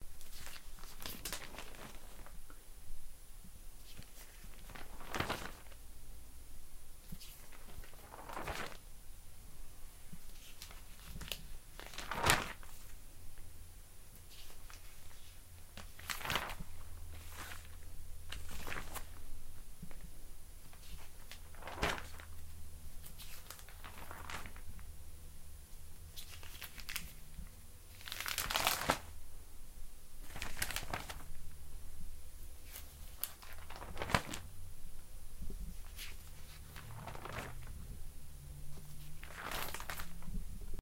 Page Scrolling
Scrolling through book
Page, Scrolling-book, Book